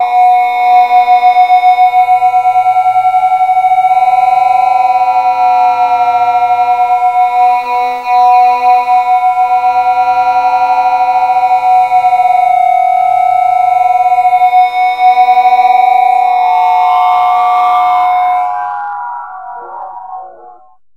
dbass made S48 04a mellow dtune
just a tweaked bit of electric bass
beat, processed